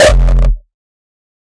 One of my Rawstyle kick F
kick, rawstyle